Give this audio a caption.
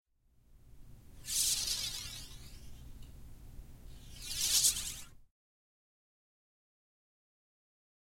Lasers Crescendo & Decrescendo
Laser sound made with a plastic curtain in a motel room
Zoom H4N Pro
lasers, laser, recording, laser-sound, sound, decrescendo, fast, pew-pew, crescendo